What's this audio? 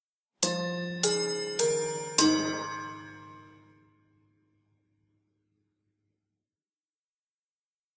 Westminster Quarters, Part 4 of 5
Plastic pen striking sequence of four rods from this set of grandfather clock chimes:
Roughly corresponds to C5, G#4, A#4, D#4 in scientific pitch notation, which is a key-shifted rendition of the fourth grouping from the Westminster Quarters:
big-ben, cambridge-quarters, chime, chimes, chiming, clock, clockwork, grandfather, grandfather-clock, hour, music, strike, time, tune, westminster, westminster-chimes, westminster-quarters